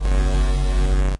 Over processed deep bass. Sounds like deep electricity surging.